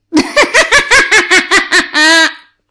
witchy laugh 2
A witchy laugh.
female, girl, grandma, granny, laugh, witch, witchy, woman